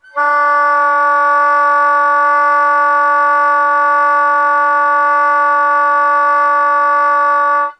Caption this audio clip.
I found the fingering on the book:
Preliminary
exercises & etudes in contemporary techniques for saxophone :
introductory material for study of multiphonics, quarter tones, &
timbre variation / by Ronald L. Caravan. - : Dorn productions, c1980.
Setup: